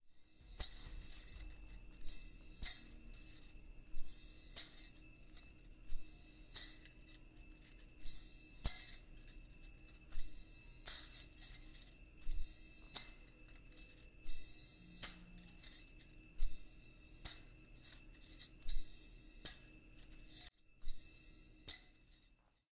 Bangles were meant to fly, just as long as you catch them! Enjoy the jingle and jangle of these bangles being thrown into the air!
bangles, jingling, sound, jewelry, jolly, bracelet